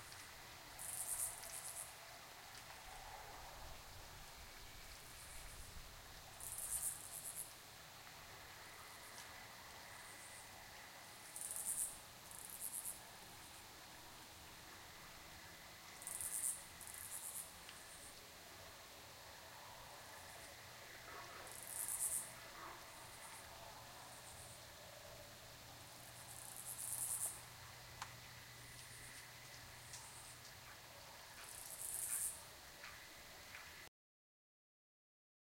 crickets night forest with 2 sharp close cicadas back and forth
cicadas, forest